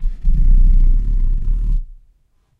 rustle.box-growl 6
recordings of various rustling sounds with a stereo Audio Technica 853A
rustle, growl, deep, cardboard